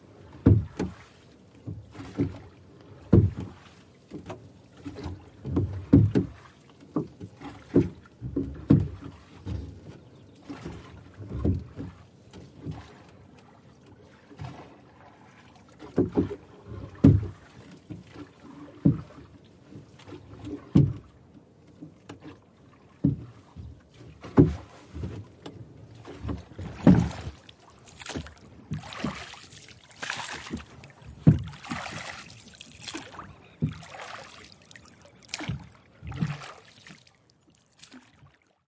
Sound of paddles rowing on the Arroyo Valizas (Rocha, Uruguay) recorded with my Zoom H4 from the inside of the boat. Some water splashes at the end. Enjoy.